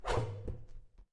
Percussive sounds made with a balloon.
rubber, percussion, acoustic